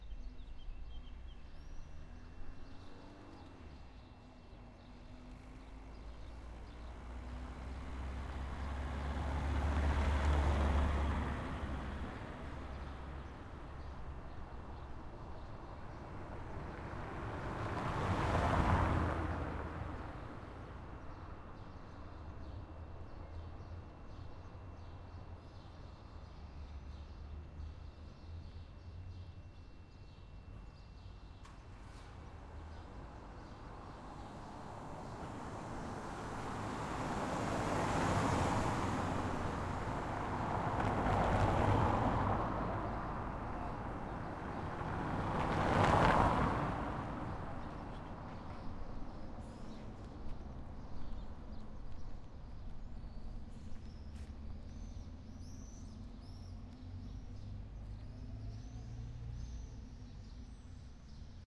porto morning torre dos clerigos cars 19
Porto, Portugal, 19.July 2009. Sunday morning at the square in front of the Torre dos Clerigos. Cars passing by on a cobblestone road.